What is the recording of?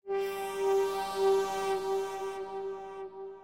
PsyG3space
Sci-Fi space style sound.
psy, sci-fi, drone, space, atmosphere